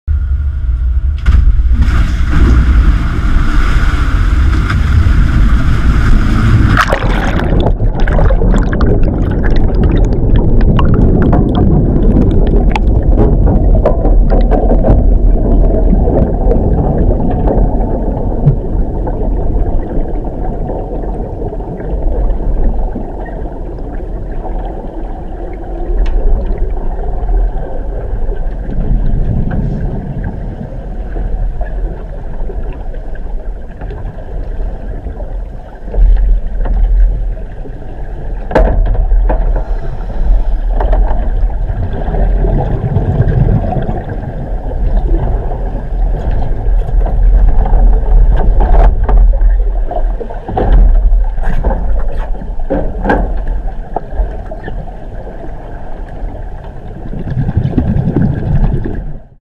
A quite epic sound of drowning or diving, when a helicopter replica submerges under water.
underwater, diver, undersea, aquatic, liquid, submerging, swimming, ship, sea, diving, water, swimmer, navy